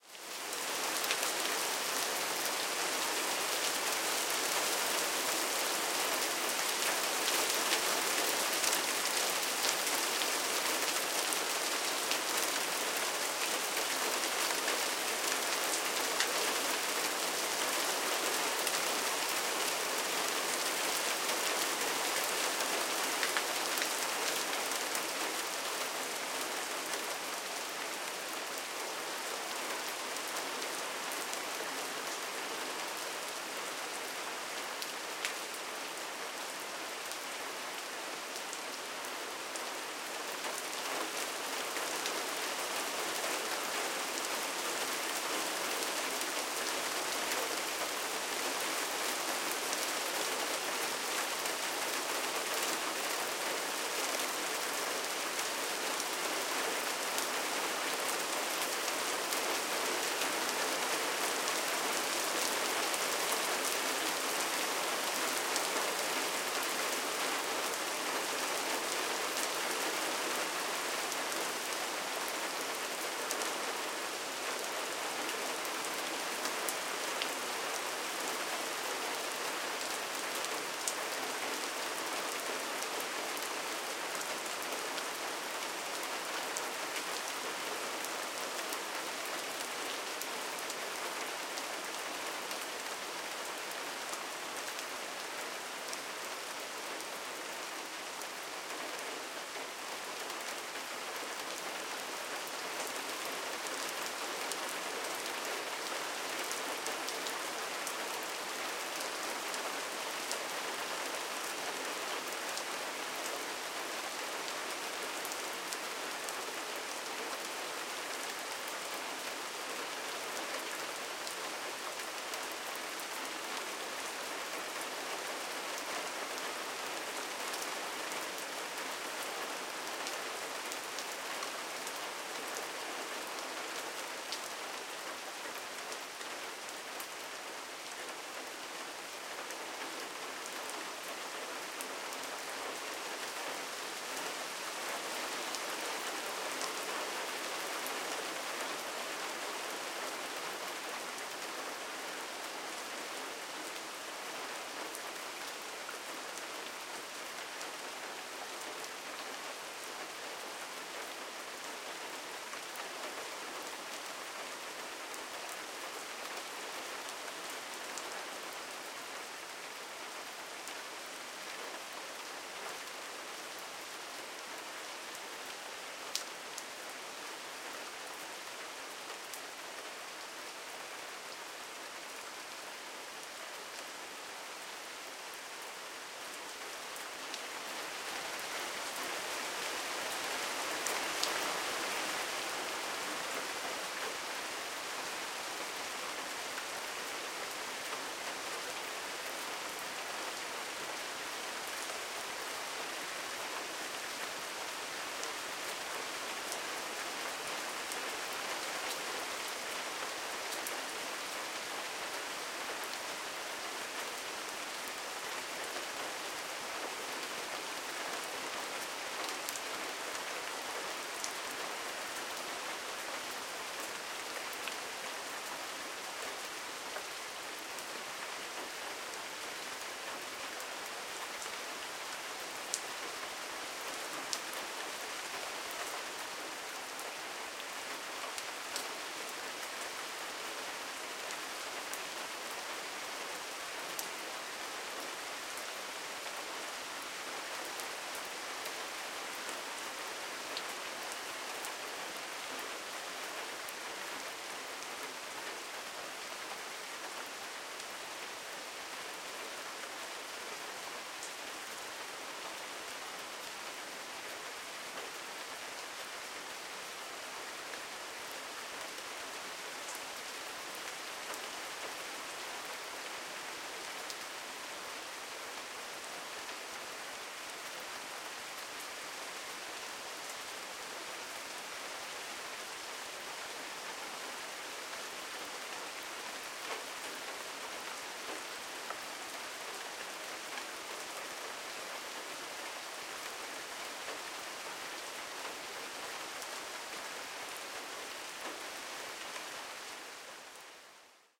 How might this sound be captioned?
Weather - Rain
Rain recorded during a thunderstorm. Recorded in Essex, UK just before 23:30 on 1st September 2017. The mic used was a Sennheiser ME66/K6 attached to an Olympus LS-14 recorder.
Essex, nature, Rain, UK, weather